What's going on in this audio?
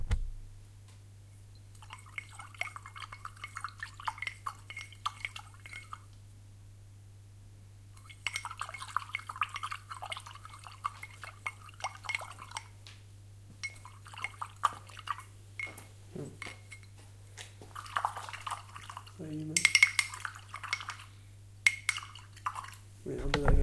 Paintbrush being cleaned in a jar - slower version
A paintbrush being rinsed/cleaned in a jar of water. Recorded with ZOOM H4N PRO.